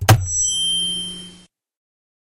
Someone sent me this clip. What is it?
Dropping the mic
drop, dropping, feedback, mic, micdrop, microphone